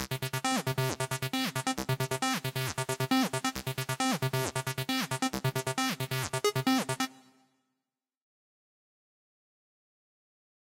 here we go low
hi end synth loop
synth, lead, loop, hard, trance